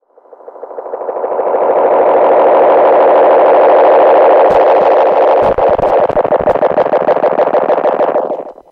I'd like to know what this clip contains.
A bit of self oscillation from my Roland Space Echo 101. No sound is actually played into the unit, it is just feeding back on itself.